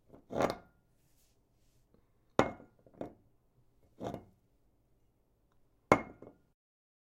picking up glass bottle
bottle, glass, picking, up